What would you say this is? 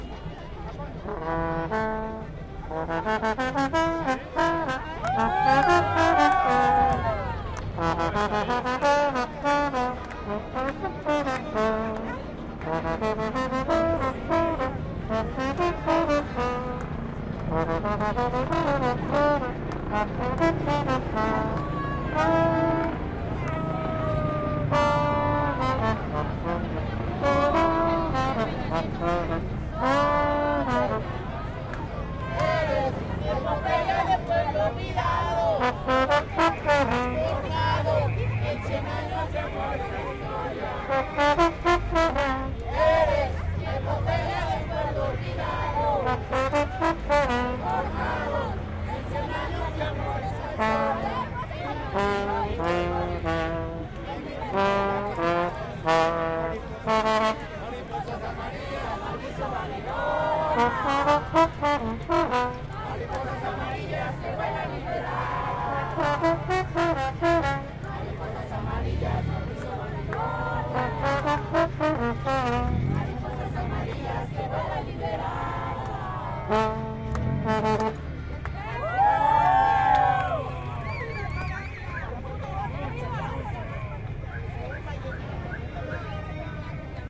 Trombón Homenaje Gabriel Garcia Marquez I
A lot of people singing in the funeral of Gabriel Garcia Marquez, Colombian Writer
Ambiental, colombian, Funeral, Gabriel, Garcia, Marquez, Music, Musician, Party, Street-music, Trombon, Trumpet, Writer